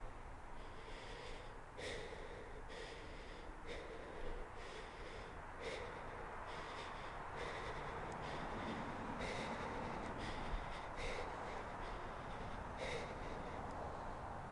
Heavy breathing
Breathing heavily due to the frigid temperatures